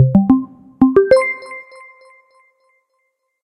131659 bertrof game-sound-intro-to-game & 80921 justinbw buttonchime02up 3
Bertrof's game intro dubbed with JustinBW's button chime, shortened...
chime, sound, attention